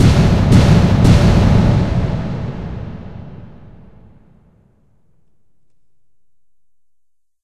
CringeScare 3hit fast
This is a modified version of "CringeScare" that includes an added boom, followed by 2 more. Has a more forceful feel to it than the original. There are 2 versions of this remix, this is the faster one. Requested by Cainmak.